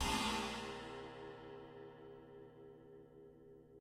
China cymbal scraped.
scrape, sample, china-cymbal